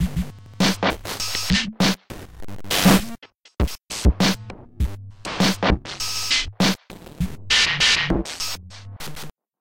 One in a series of 4-bar 100 BPM glitchy drum loops. Created with some old drum machine sounds and some Audio Damage effects.